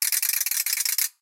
ratchet small04
Small ratchet samples. This is the most common size used in orchestras and elsewhere.
orchestral, percussion, rachet, ratchet, special-effect